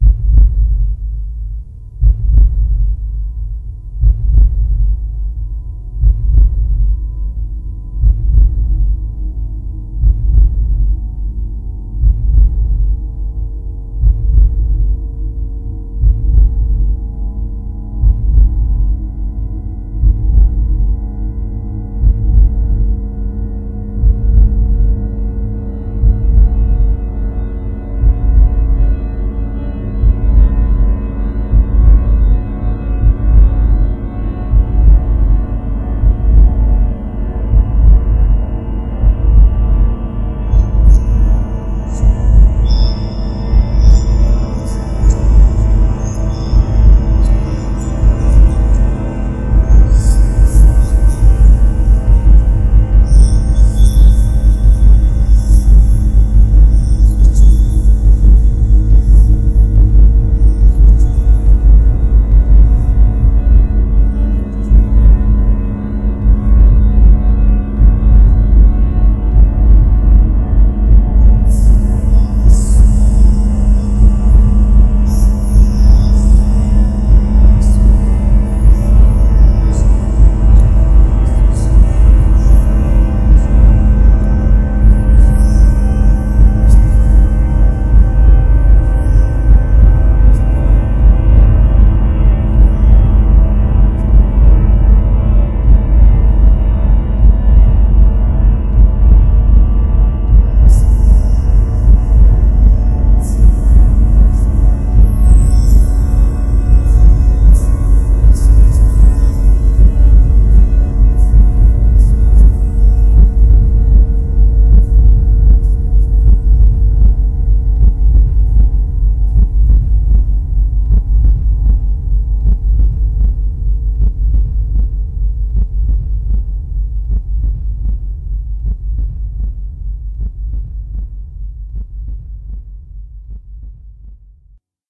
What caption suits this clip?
Hypnotic Heartbeat Atmosphere (Freqman Cliche Hypnotic)
A very long atmosphere containing a heartbeat I synthesized from scratch. There's the heartbeat, then three loooong pads that lead up to the part where I used freqman's Cliche Hypnotizing sample. I HP-filtered the hell out of that thing, then put delay on it, rearranged it by reversing parts and I stretched it out some. At the end I tried to make two heartbeats at one time, didn't really work, I just got really low bass distortion... Anyways, This is a long one (+2:00!) Addition to my "Detailed Soundscapes" Pack.
remix, long, new-age, mellow, heartbeat, track, electronica, atmosphere, pad, ambient, electric, effects, sphere